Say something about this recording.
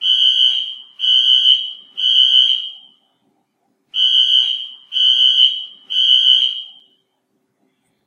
Veloce Alarm
This sample is a field recording of an interior fire alarm at an apartment complex.
alarm, field-recording, fire, disaster, apartment